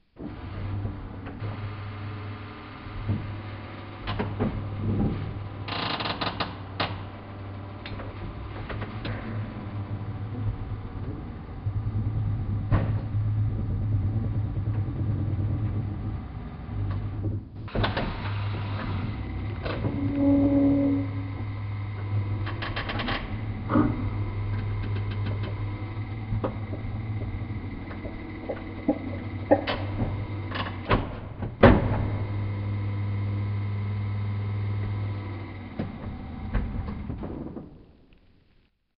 Coffee machine - Mechanism 4X slow
coffee-machine, buzz, clank